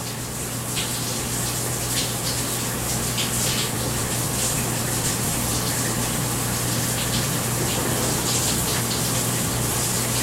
The sound of a shower from outside the door. Recorded on a Sennheiser MKE 400 Shotgun Microphone.